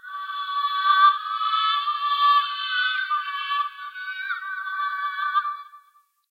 deafen effect
HOW TO MAKE THIS EFFECT:
1. Open a sound in editor (e.g. Audacity)
2. Clone it
3. Take a original or a copy and change the rate (both speed and height) up - use full octaves (@ Audacity: 100%, 300%, 700%, 1500%, 3100%, etc - it depends on basic length of the sound and just try, try, try ;-))
4. Change the rate back (@ Audacity: -50%, -75%, -87.5%, -93.75%, -96.875% etc)
5. Mirror the one vertically. Probably you know - if two sounds are the same, you won't hear anything. But after above changes, you have two similar and NOT same sounds.
5.5 ;-): After the mixmaking, you have that layer of sound which was lost before (when you'd been increasing the rate). Final result is always subtraction and this is our "deafen" piece.
6. Enjoy!